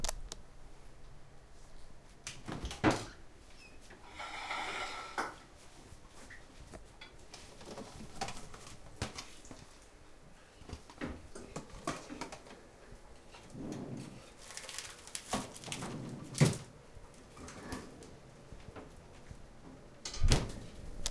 This sound is part of the sound creation that has to be done in the subject Sound Creation Lab in Pompeu Fabra university. It consists on a man opening a fridge and looking for something inside it.
Fridge, Kitchen, UPF-CS14, Cooking